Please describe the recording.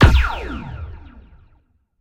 Made using a contact mic and processing. Must credit if you use the sample.
lazergun, sci-fi, zap, alien, gun, lazer, ion